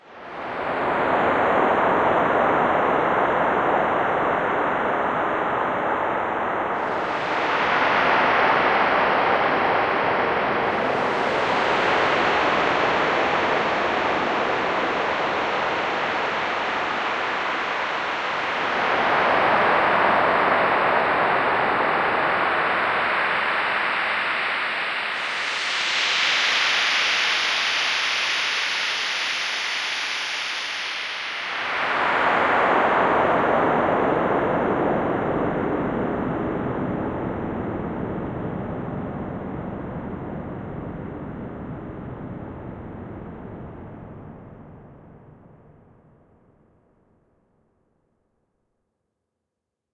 Simple recording of white noise from a synth while moving the bandpass filter values in realtime. My only sample for the contest not taken from the real world!
synth, wind